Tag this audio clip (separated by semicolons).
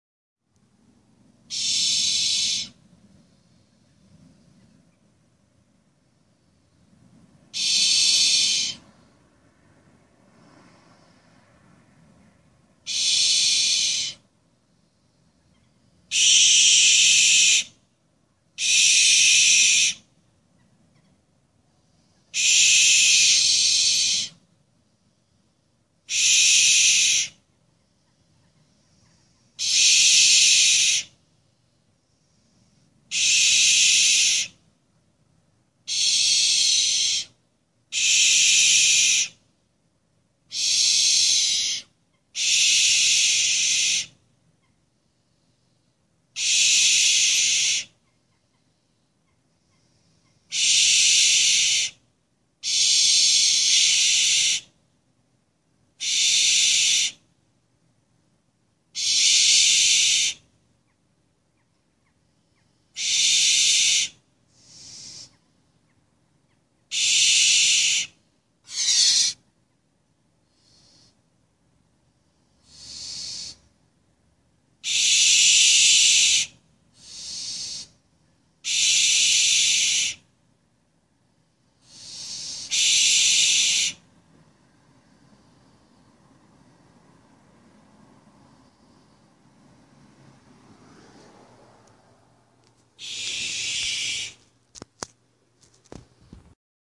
ambient; birds; field-recording; Hiss; nature; Night; Owl; summer